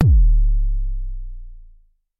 MBASE Kick 13
i recorded this with my edirol FA101.
not normalized
not compressed
just natural jomox sounds.
enjoy !
analog, bassdrum, bd, jomox, kick